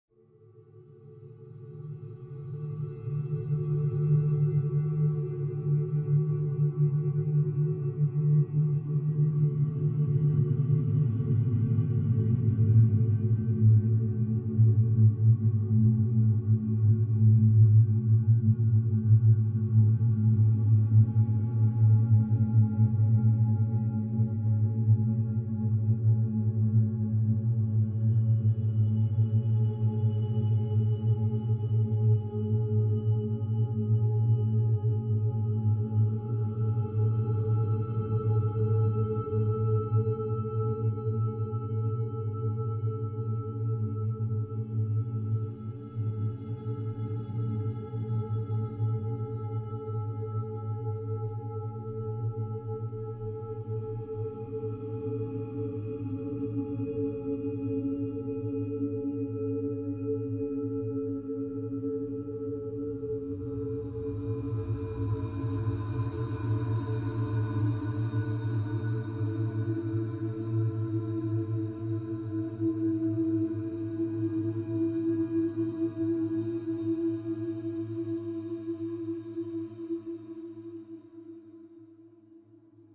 pad created with audacity